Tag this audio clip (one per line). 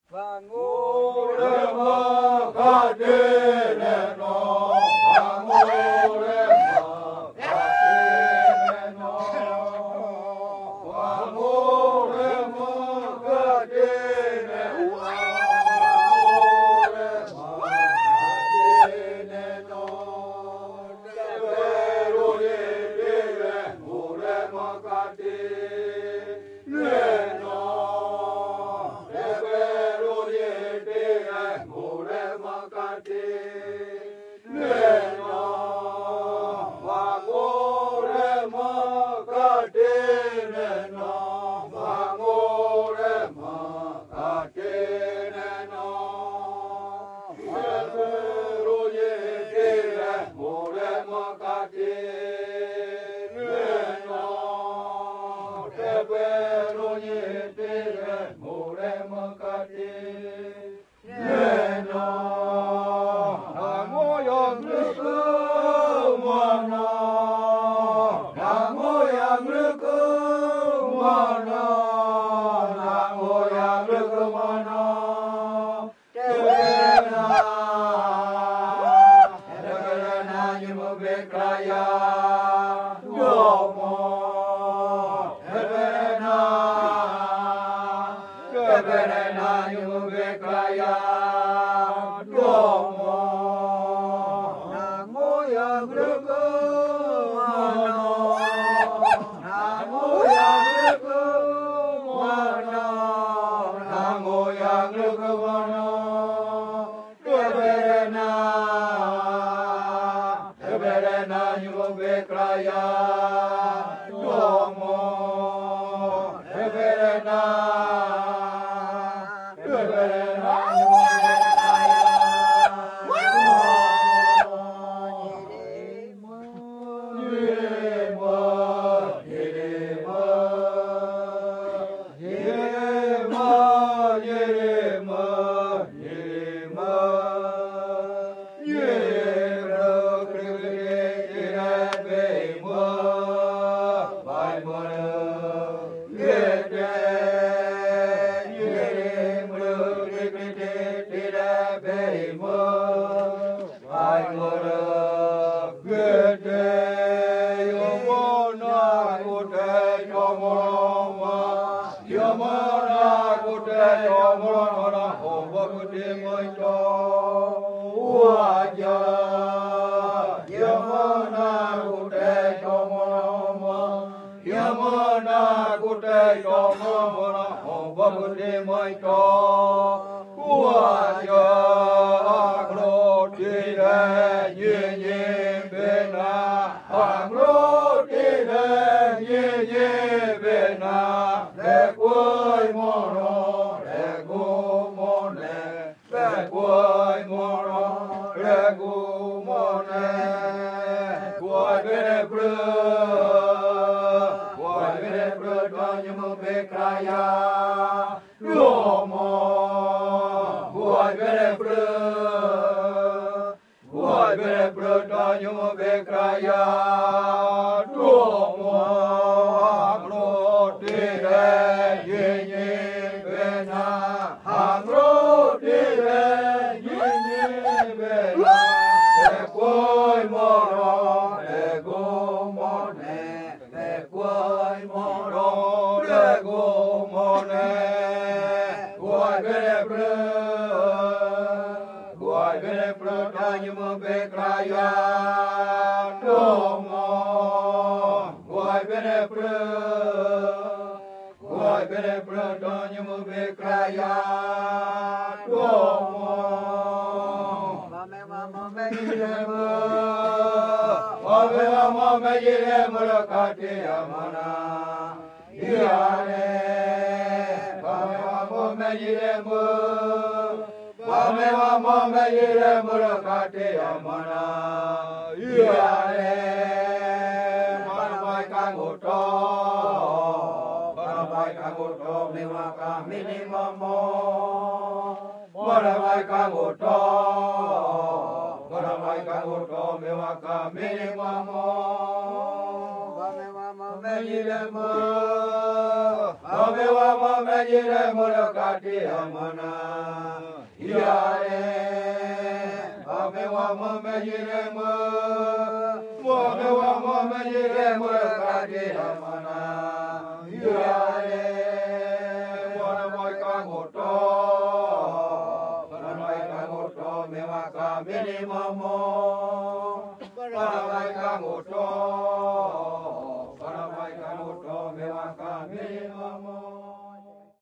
amazon
brasil
brazil
caiapo
chant
field-recording
indian
indio
kayapo
male-voices
music
native-indian
rainforest
ritual
tribal
tribe
tribo
voice
warrior